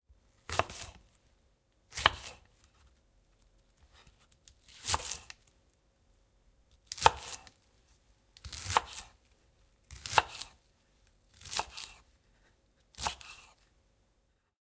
Sound of someone who is cutting vegetables (chicory). Sound recorded with a ZOOM H4N Pro.
Son de quelqu’un qui coupe des légumes (endive). Son enregistré avec un ZOOM H4N Pro.